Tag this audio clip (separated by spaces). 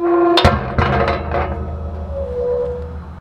close creak iron latch moan squeak